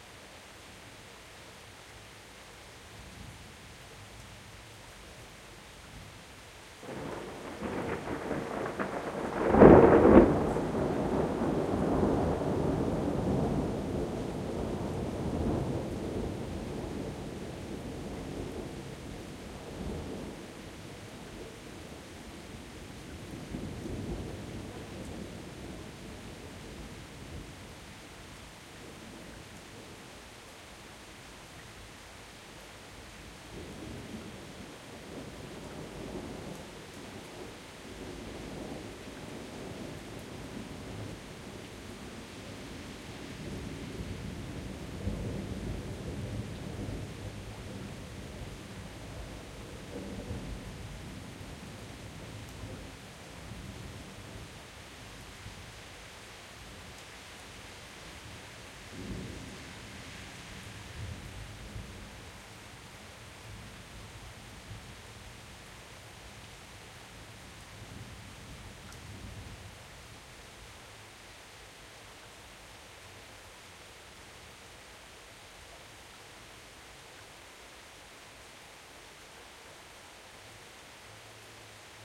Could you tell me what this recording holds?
Thunderclap during a rainy summer thunderstorm at July,3rd 2008 in the city of Cologne, Germany. Sony ECM-MS907, Marantz PMD671.
rain, thunderstorm, thunder